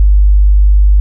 50hzSine raw
part of drumkit, based on sine & noise
drums, noise, sine